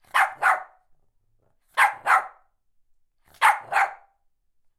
Dog Shih Tzu Bark Series 01
Shih Tzu dog, barking
Animal, Bark, Barking, Dog, Shih-Tzu